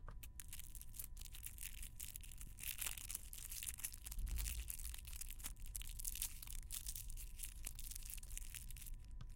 playing with some gum wrappers
thing, wrapper